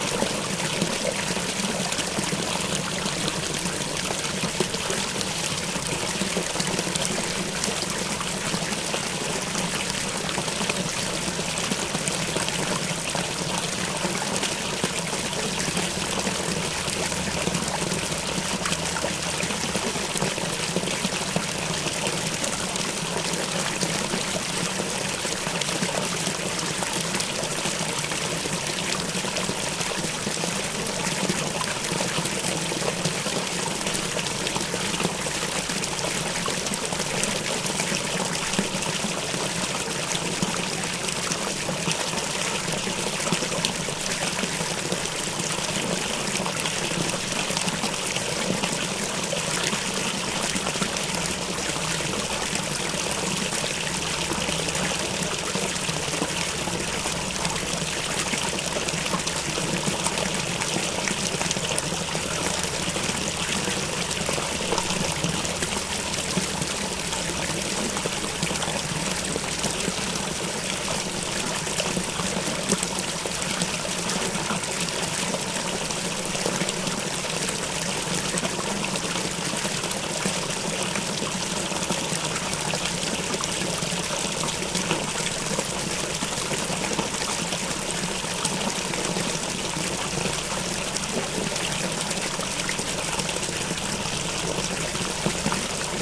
Leak in Dam
dam, creek, leak, river, water, stream, drain, outdoor